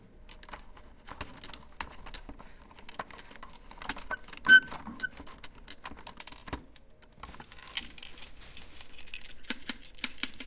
mySound GPSUK mini-table-football
A miniature game of table football being played
Galliard, Primary, School, squeaky, table-football, UK